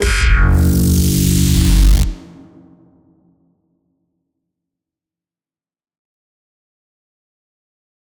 Lazer Pluck 2
Lazer sound synthesized using a short transient sample and filtered delay feedback, distortion, and a touch of reverb.
alien, synthesizer, synth, Laser, zap, Lazer, sci-fi, spaceship, buzz, monster, beam